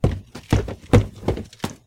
Footsteps-Stairs-Wooden-Hollow-05
This is the sound of someone walking/running up a short flight of wooden basement stairs.
Step, Wooden, Wood, Walk, Stairs, Hollow, Run, Footstep